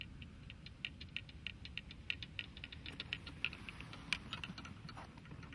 golf troley passing
Golf Trolley passing on green, from a distance passing the mic. Sunny day
golf, green, passing, troley